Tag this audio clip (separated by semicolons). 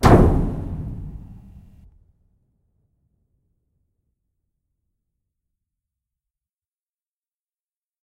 metallic; bang; explosion